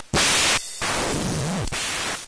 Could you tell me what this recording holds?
I BREAK IT YOU BUY IT !!! It's a new motto.....
Hehehehe This is a Bent DR 550 MK II YEp it is....
bending, bent, circuit, deathcore, dr550, glitch, murder, slightly, toyed